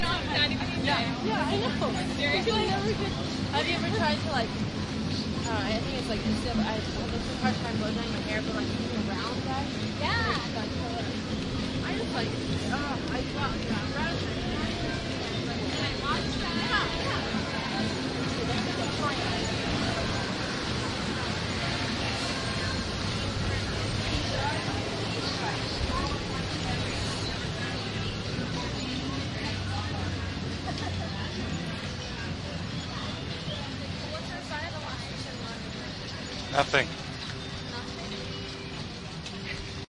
Walking to the American History Museum recorded with DS-40 and edited in Wavosaur.